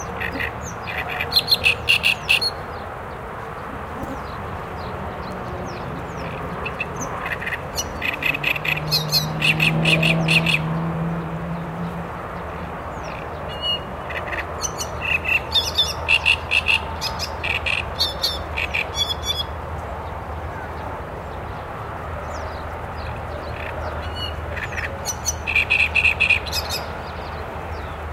Omsk Victory park 10 1
Athmosphere in the Victory park, Russia, Omsk. Slightly into the interior of the park, lake wetland. Loud sound of bird. Hear noise of cars from nearby highway.
XY-stereo.
Russia, noise, athmosphere, lake-wetland, Omsk, victory-park, bird, park